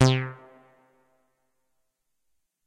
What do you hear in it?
MOOG BASS SPACE ECHO C2
moog minitaur bass roland space echo
space, bass, moog, minitaur, roland